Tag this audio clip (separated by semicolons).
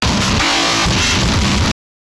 fuzzy; processed; glitchbreak; love; t; k; e; y; pink; deathcore; l; o; h